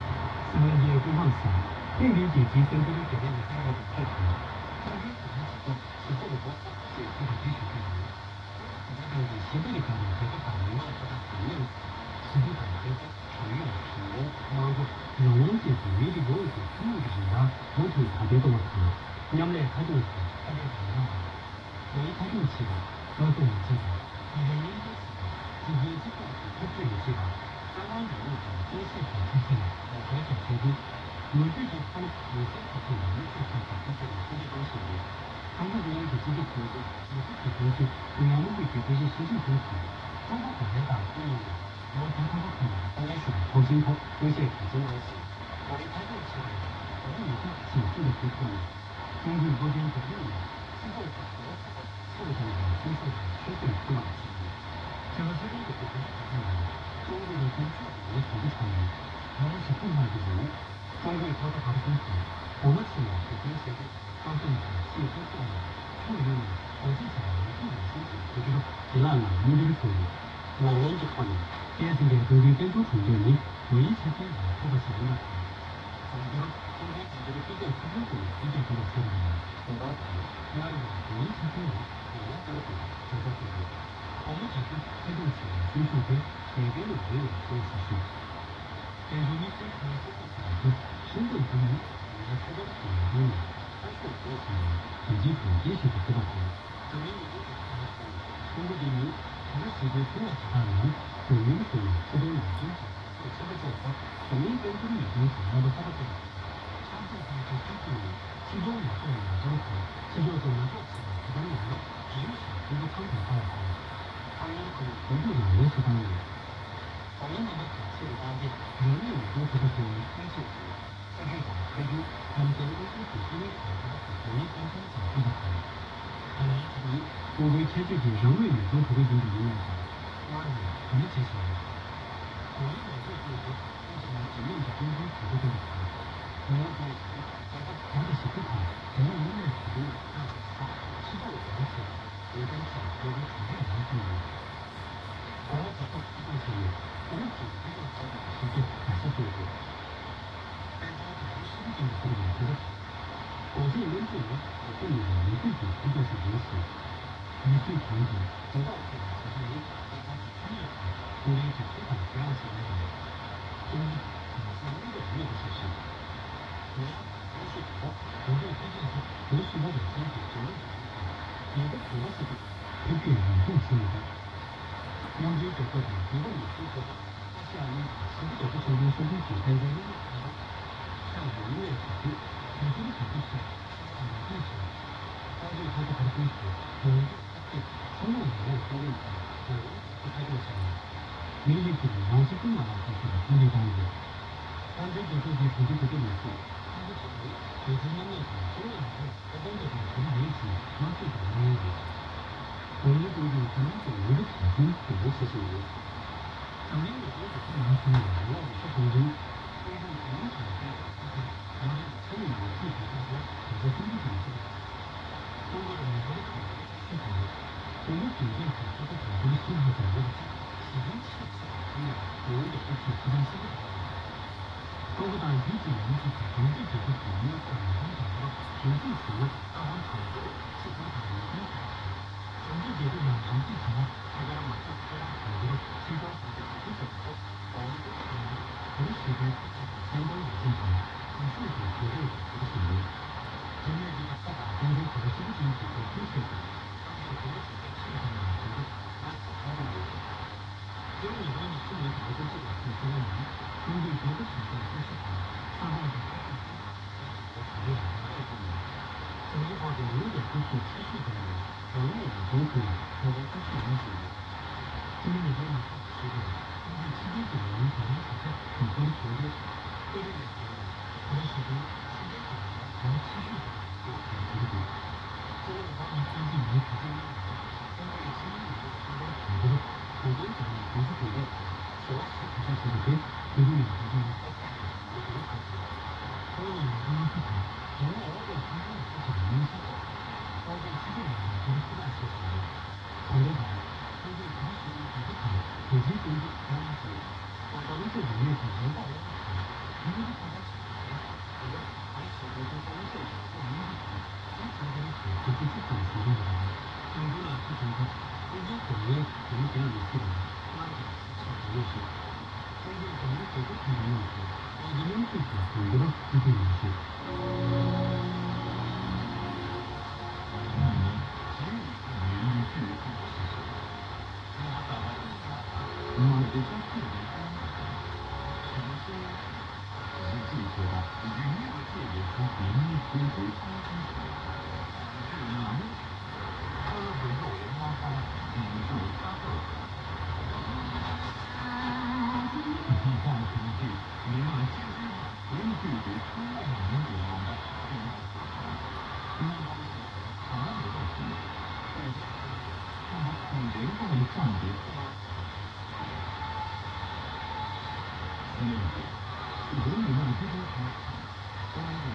Faint radio broadcast with some signal hiss, captured on an old tube radio with a long antenna.